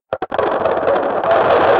Glitch effect made with FL Studio.
2021.